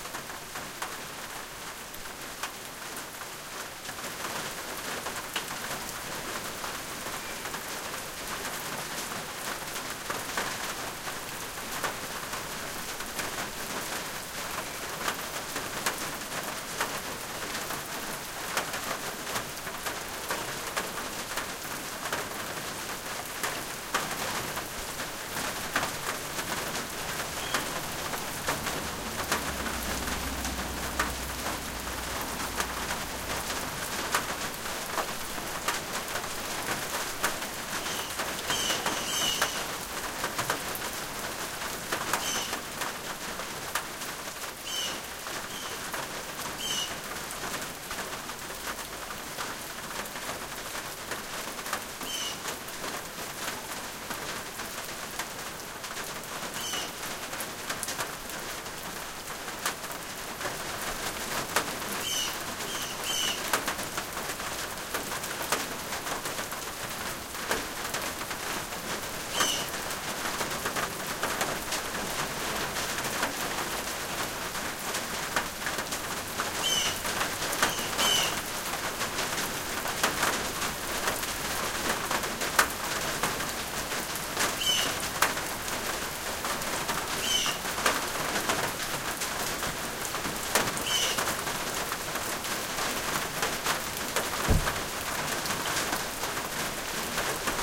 Rain in my backyard